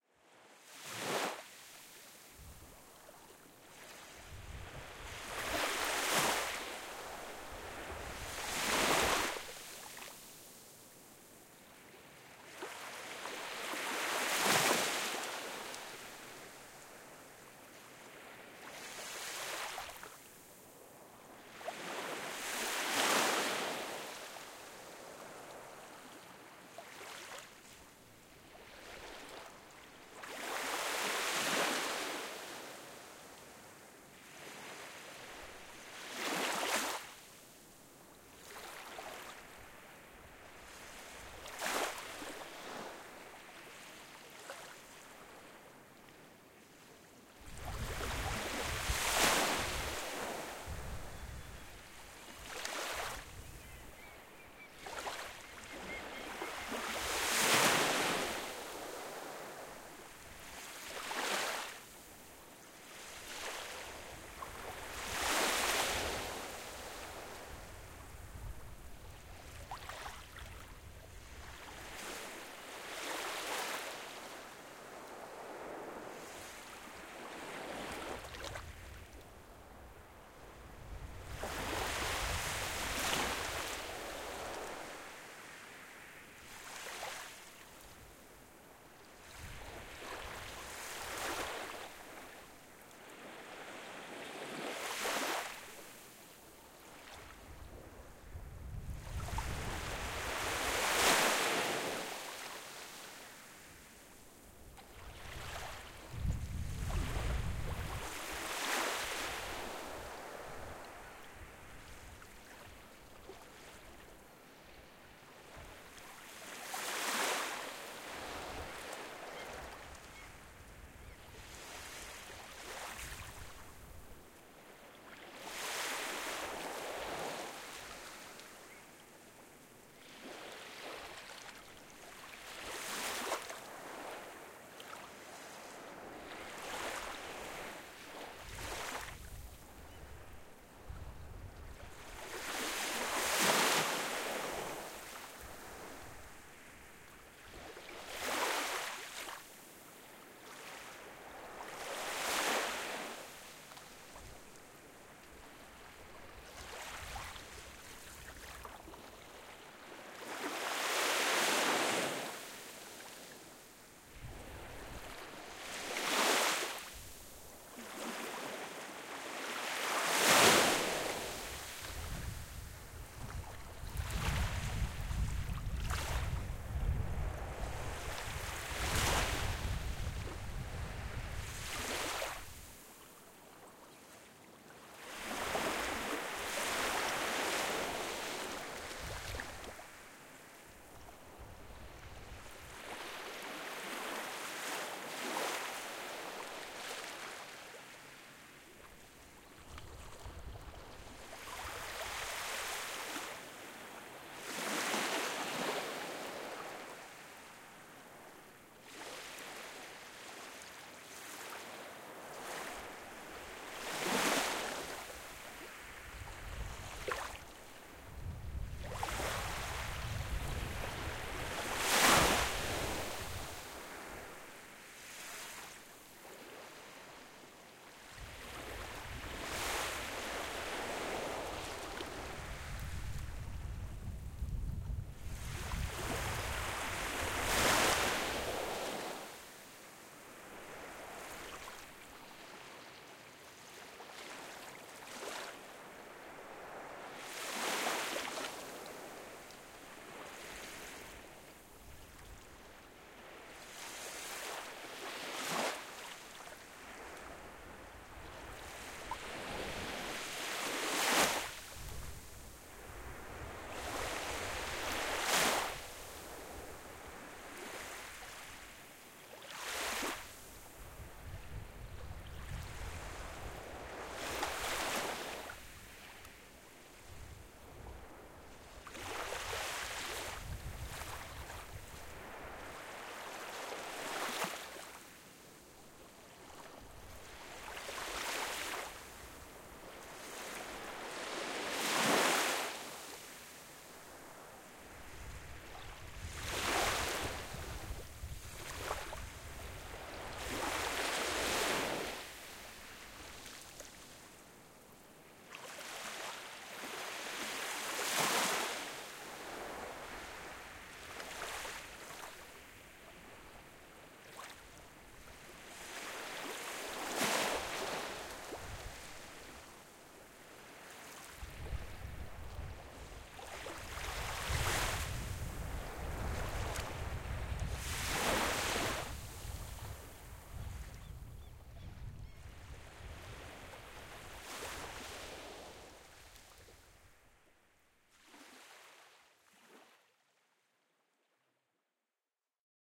Baltic Sea
Balticsea, beach, sea, shore, water, waves